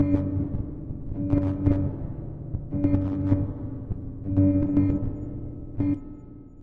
just some disturbance rhythmic noisetaken from vectrave an experimental virtual synthesizer by JackDarkthe sound was heavily processed with distorsion and reverb[part of a pack called iLLCommunications]
digital, distorsion, fx, tlc